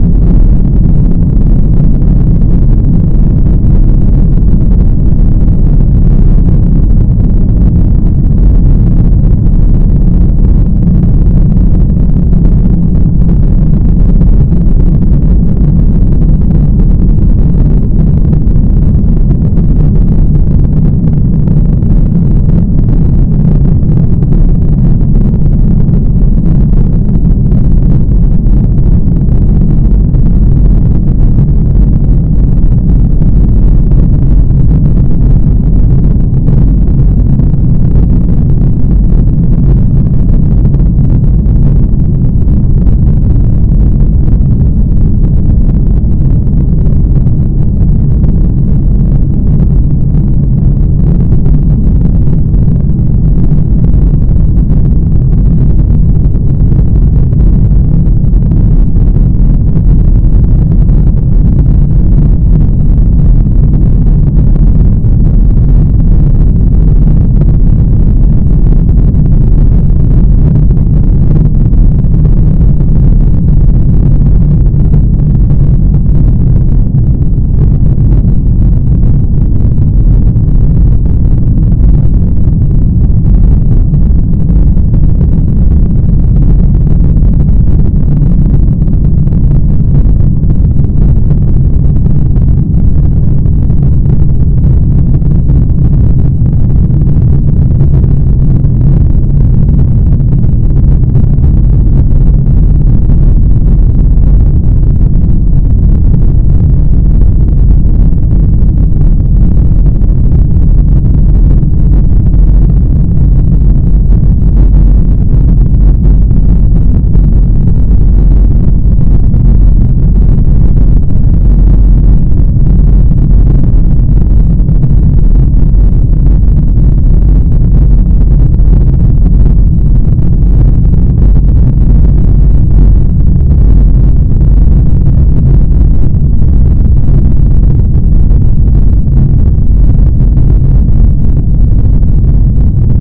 Rocket Thrust effect
Heavy Rumble of a rocket thrust
burn, cinematic, Rocket, space